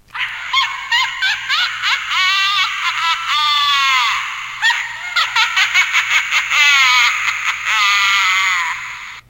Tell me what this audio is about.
Cackling witch toy recorded with B1 microphone.
cackle, female, toy